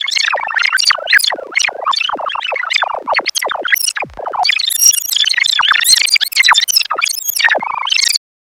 The sound is a Juno 6 synth emulating (sort of) the sound of a cassette being rewound very quickly.